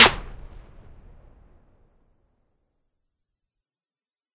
Custom Hip Hop Luger Super Snare - Nova Sound
Southern Hip Hop Trap Style Drums. Sound Designed by Nova Sound
We need your support to continue this operation! You can support by: